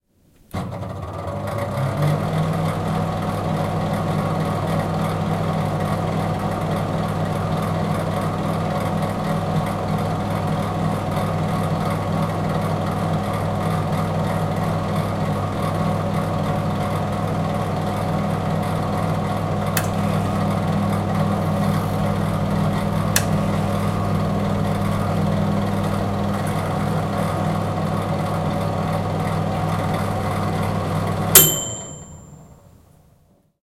Son d’un micro-ondes. Son enregistré avec un ZOOM H4N Pro.
Sound of a microwave. Sound recorded with a ZOOM H4N Pro.